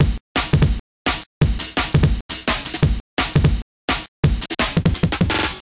break beats loops 170